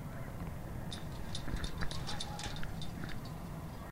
Gate Chains
These are the metal chains that go cling cling.
chains, gate, metal